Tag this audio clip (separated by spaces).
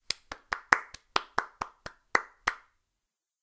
applause clap clapping hands person single singular